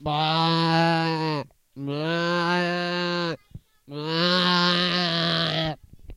This is a recording of my friend who can make an incredible Llama/Sheep/Goat sound